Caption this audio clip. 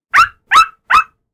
Triple Small Dog Bark
A recording of my talented dog-impersonating sister on my Walkman Mp3 Player/Recorder. Simulated stereo, digtally enhanced.